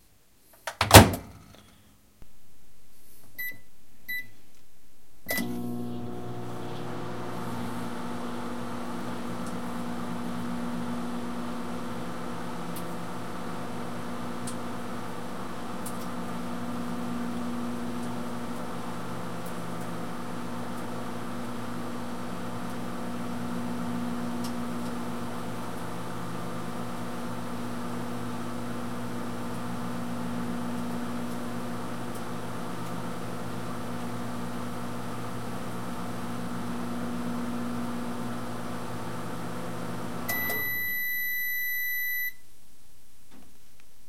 Clip of a microwave oven in operation, including beeps from keypad presses and a final signal beep indicating end of cooking time.
door, microwave, oven